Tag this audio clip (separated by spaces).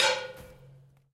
Plastic sewage hit tube